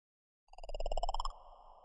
A simple alien like sound
Alien, alien-sound-effects, Space